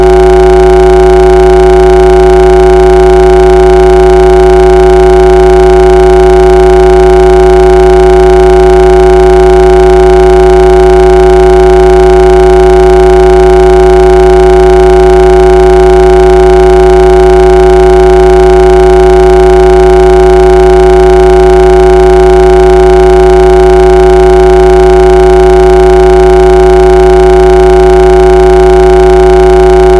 For the second experience, i had try to make an other wave than the first and There is a resemblance with this.
I had use weakness frequency like 70 Hz and many shape of wave : carré, dent de scie and sinusoid.
number, 2, experience